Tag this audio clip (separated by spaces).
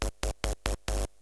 electric machine electronic signal cable noise